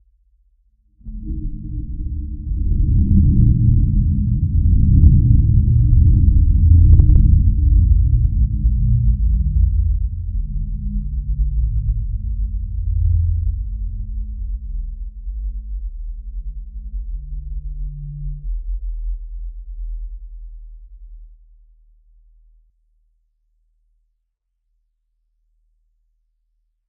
stone sample2 spear2
a stone sample (see the stone_on_stone sample pack) processed in SPEAR by prolonging, shifting, transforming the pitch and duplicating the partials
fx, low, processed, stone